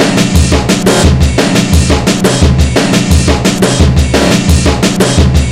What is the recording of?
variation of "apox-A" with vst slicex (recontruct sample) and soundforge 7 for edition
beat, beats, break, breakbeat, breakbeats, breaks, drum, drumbeat, drum-loop, drumloop, drumloops, drums, loop, quantized, snare